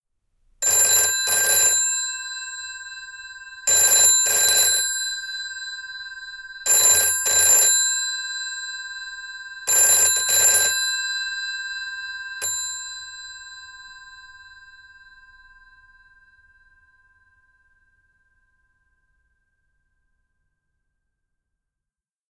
3ft dry 4 rings
60s, 70s, 746, 80s, analogue, GPO, Landline, office, phone, post, retro, telephone